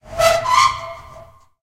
Squeaking Metal Closet Door in Basement 3
Opening and closing a metallic closet to make a painfully squeaking sound. Recorded in stereo with Zoom H4 and Rode NT4.
aching, basement, close, closing, door, gate, horror, iron, metal, metallic, open, opening, painful, portal, room, squeaking, squeaks, squeaky, squealing, squeals, terror